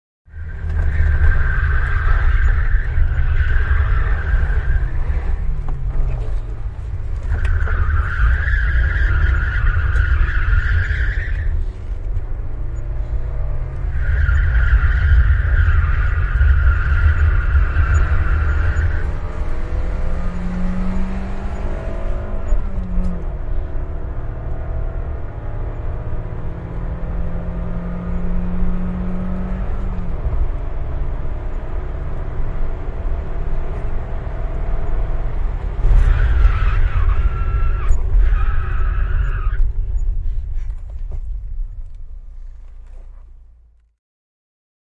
Henkilöauto, ajoa, renkaat, jarrutus / A car, fast driving on asphalt, bends, tyres screeching, at the end brakes with tyres screeching, engine shut down, interior, Saab 9000
Saab 9000. Nopeaa ajoa asfaltilla, renkaat ulvovat mutkissa. Lopussa jarrutus renkaat ulvoen, moottori sammuu. Sisä.
Paikka/Place: Suomi / Finland / Nummela
Aika/Date: 29.09.1992
Ajaa, Ajo, Auto, Autoilu, Autot, Brakes, Drive, Driving, Field-Recording, Finland, Interior, Jarrut, Renkaat, Run, Soundfx, Suomi, Tehosteet, Tyres, Ulvoa, Yle, Yleisradio